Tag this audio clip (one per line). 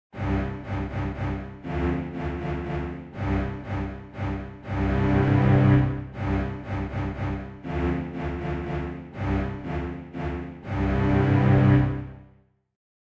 atmosphere
cello
music
sound
ambience